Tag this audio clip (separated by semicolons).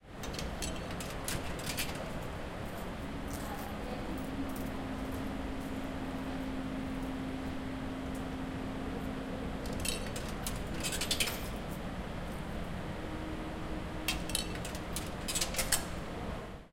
insert; comercial; centre; park; machine; glories; UPF-CS13; parking; payment; mall; coins; campus-upf; shopping